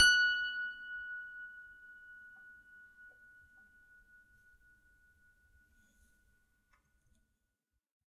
a multisample pack of piano strings played with a finger
fingered, multi, piano, strings